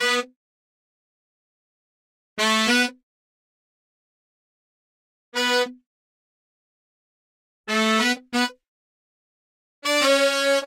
14 saxes bL
Modern Roots Reggae 14 090 Bmin A Samples
14, Reggae, Samples